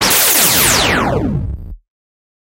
MnG - Big Laser Shot
Good day.
Gunshot from biomech prototype
Support project using
bot, effect, future, fx, gun, mech, robot, sci-fi, sfx, shot, sound-design, sounddesign, sound-effect, soundeffect